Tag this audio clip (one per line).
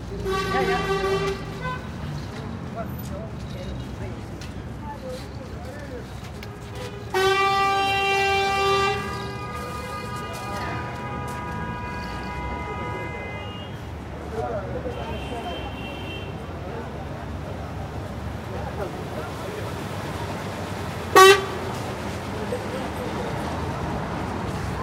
honks
throaty
horn
truck